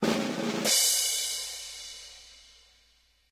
circus short
from a set of dodgy circus fx recorded a while back
circus, drum-roll, drumroll, punchline